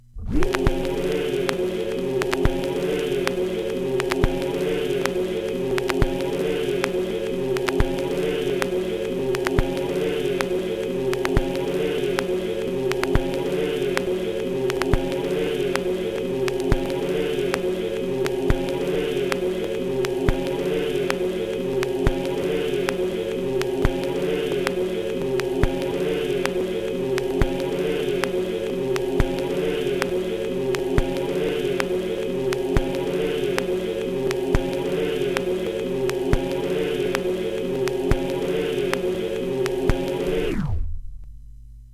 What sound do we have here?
skipping vudu record
Record noise from a very old, warped and scratched up voodoo record from early last century digitized with Ion USB turntable and Wavoasaur.
skip, record, skipping, phonograph, scratch, noise, vinyl, popping, loop